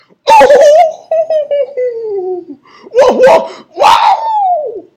Tom-esque Scream 1
A loud painful scream reminiscent of those of Tom in the old "Tom and Jerry" cartoons.
cartoon, hanna-barbera, human, scream, tom-and-jerry